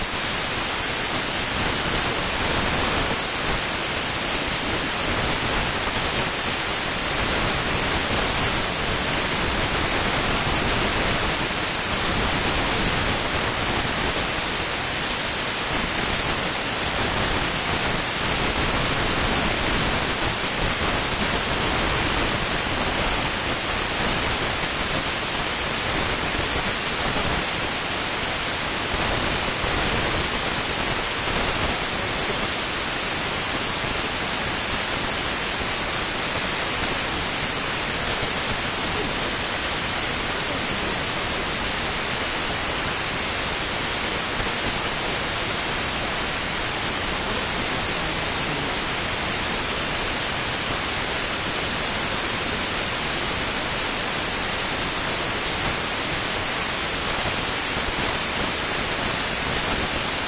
Radio Static 2
I recorded the radio on an MP3 player making staticky noises when it wasn't working well. This sound is almost exactly one minute long.